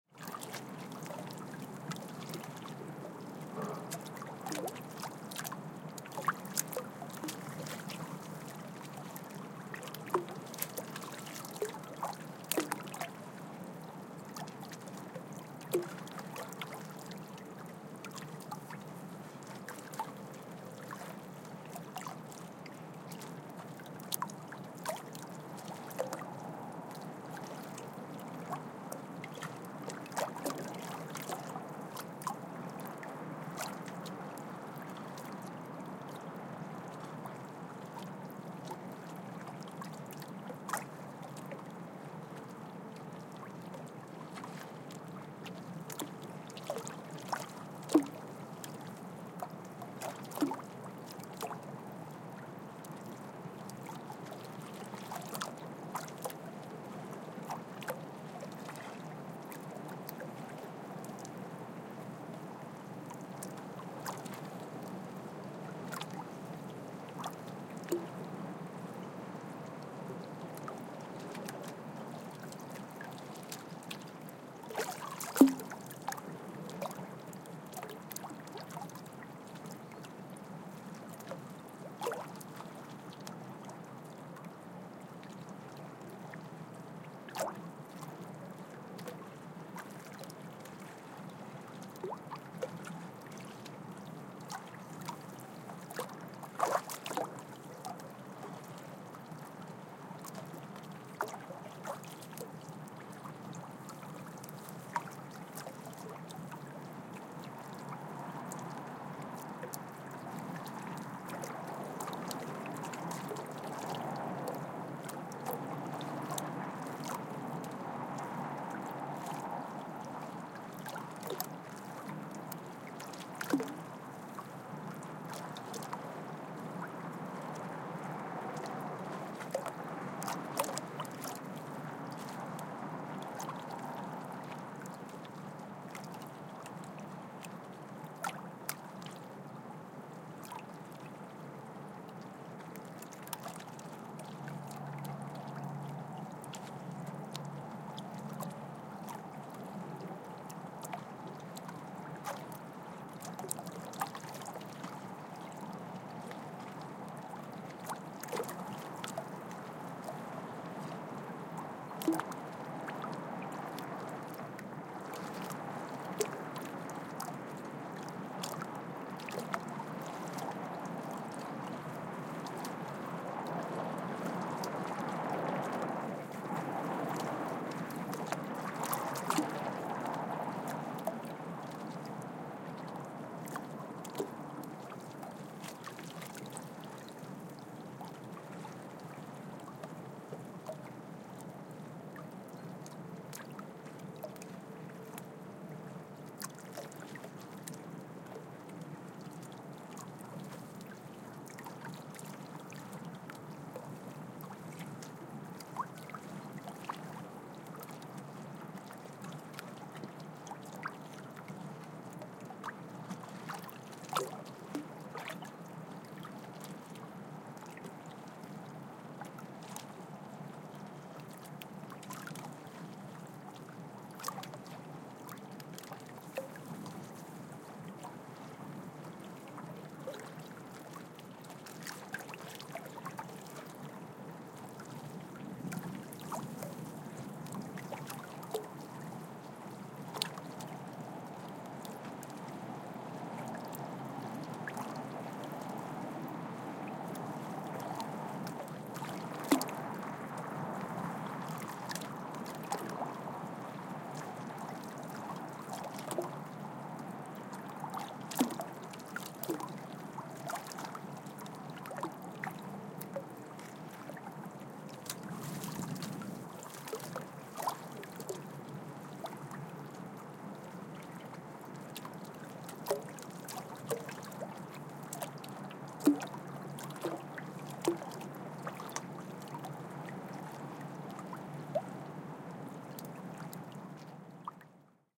Light waves hitting harbour wall at Hamburg Fischmarkt
Hamburg City Harbour #2
Light waves hitting the harbour wall next to the Fischmarkthalle Hamburg.
recorded at night, no people passing by, harbour and city noise in the background audible as well are a few passing cars on the nearby cobblestone street of Fischmarkt.
blends well with the other 2 recordings of the "Hamburg City Harbour" pack.
field-recording, fischmarkt, hamburg, harbor, harbour, harbour-wall, light, river, soft, splashing, water, waves, waves-hitting-wall